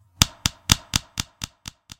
hand clap with effects
this sound started with a simple recording (yeti microphone) of me clapping my hands. I later added effects to it in Ableton.
percussion, clap, beat, effects, drum, delay, body-percussion